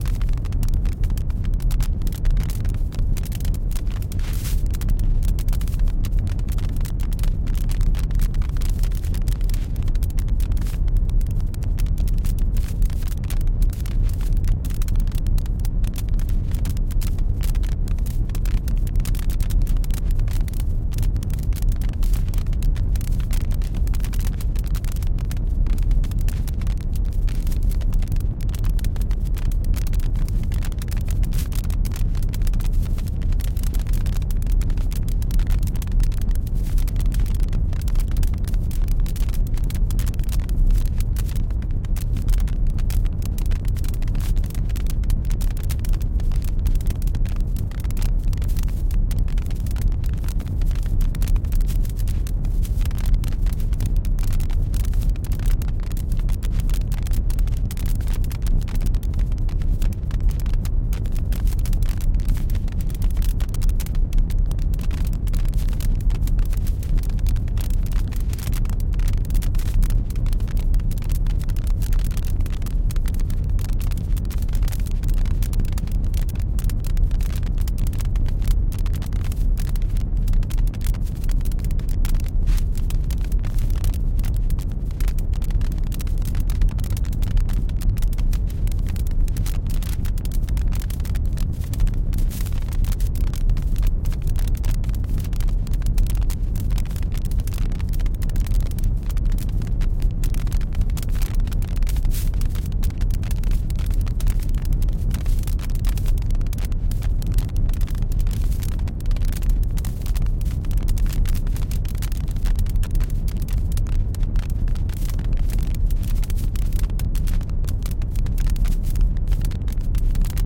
Synthetic Fire Effect
This sound is a fully artificial fire effect made in Pure Data and is based on an example in Andy Farnells book Designing Sound. It's made by filtering and modulating white noise (subtractive synthesis). I've modified it and made it stereo. After writing it into a file I made some edits (start/end fade etc.) in Sound Forge Pro. If You want to make it an eternal flame ... it's perfectly loopable ;)
PS: One advantage of synthetisis is that there are absolutely no unwanted sounds (like interfering noise one might get by recording a fire out in the field).
synthetic, atmosphere, ambiance, effect, burning, hissing, noise, crackling, sound, hiss, ambient, sfx, synth, pure-data, artificial, sound-design, fire, digital, combustion, blazing, ambience, fx